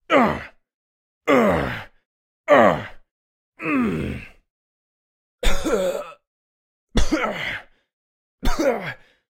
Voice Male Grunt Mono
Sound of male grunting/growling/coughing.
Gear : Rode NTG4+
coughing, video, fight, clear, pain, grunt, male, game, cough, voice, growl